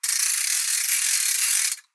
ratchet small01
Small ratchet samples. This is the most common size used in orchestras and elsewhere.
ratchet, special-effect